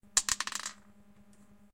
The rolling of a dice on a table.